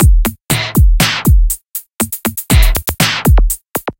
2 Measures 120 bpm Drumbeat Electronic